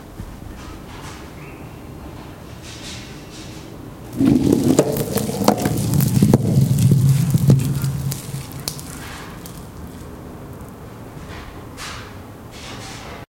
Rolling weight on floor
Recorded with rifle mic. Following a weight rolling on a floor.
weight, floor, Rolling